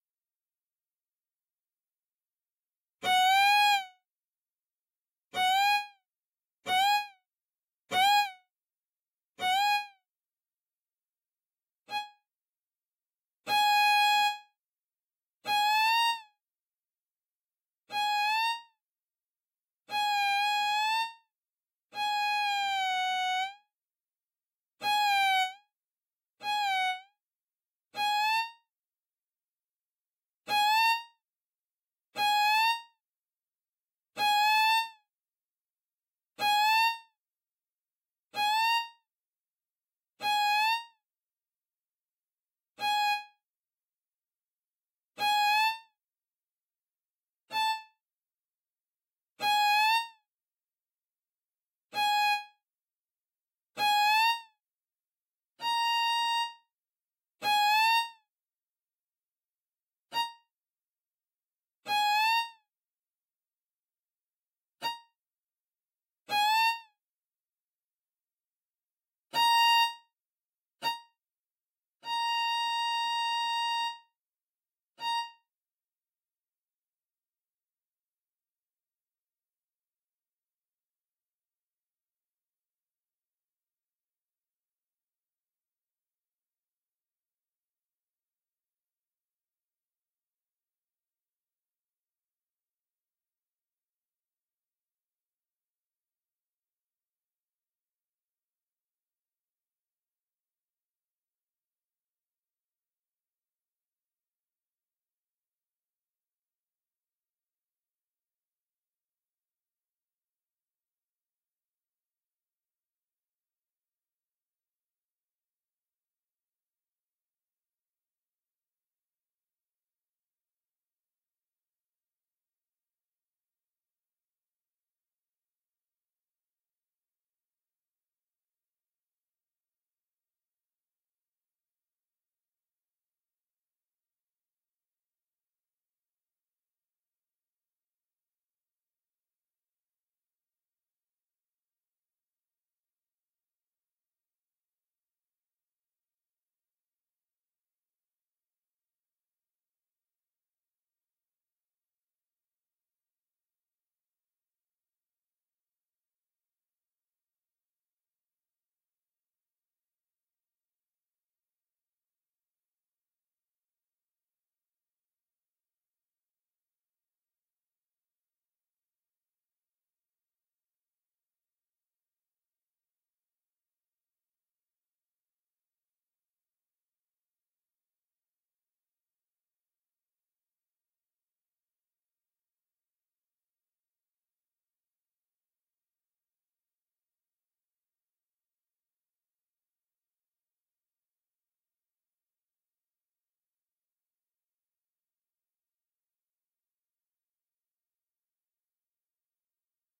cartoon whining
cartoon-appearance, chord, whining, appearance, cartoon, whine, chords